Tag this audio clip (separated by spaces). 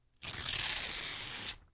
tearing rip paper